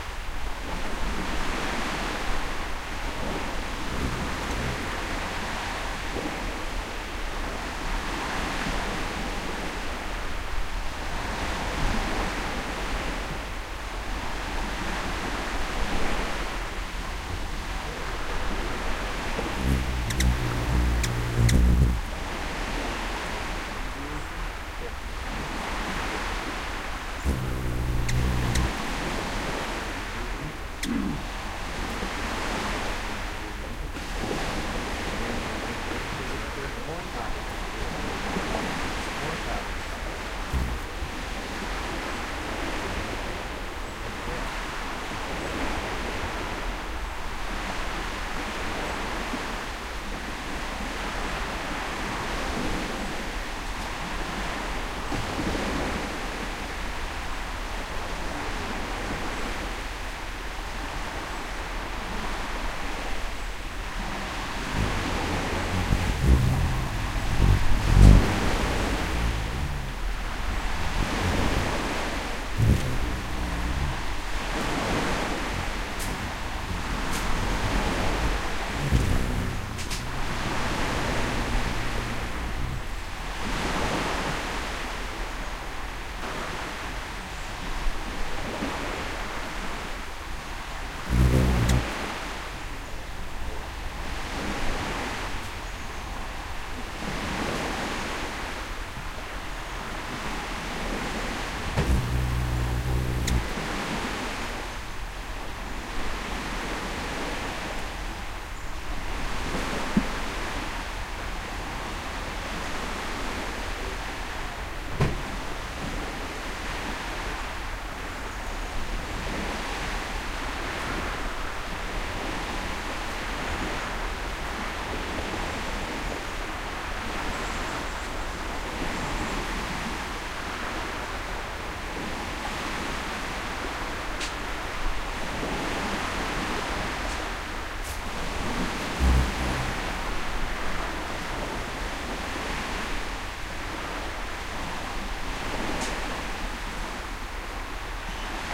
hummingbird-at-feeder
Recorded 08/16/06 at 7:55 AM, at a hummingbird feeder, near the tiny town of Good Hart in Northern Michigan. I placed two Behringer measurement microphones with wind screens extremely close to a hummingbird feeder. You hear the beating of the birds wings and also its extremely quick chirping. I think these were Ruby Throated hummingbirds. My field guide said they don't live that far north, but they certainly didn't look anything like any other kind of hummingbird. I used a Marantz PDM660. No processing of any kind. Oh, yeah, there is a little thing called Lake Michigan in the background. A very peaceful day it was, I must say!
hummingbird, field-recording